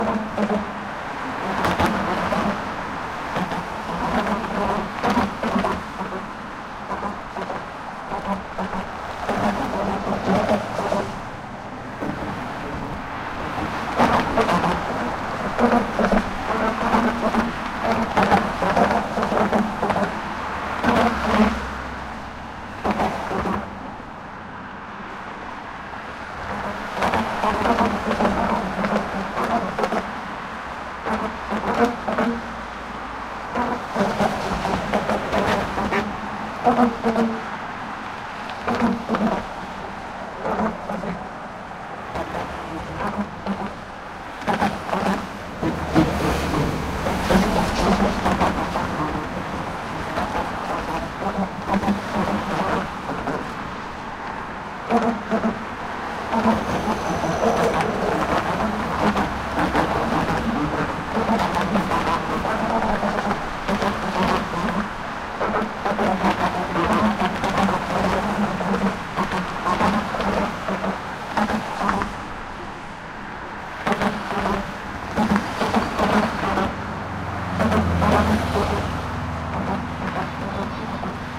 GGB SE approach SAE T02
Ambient recording of the Golden Gate Bridge in San Francisco, CA, USA facing the southeast approach: traffic noise mid-day. Recorded December 18, 2008 using a Sony PCM-D50 recorder with wind sock.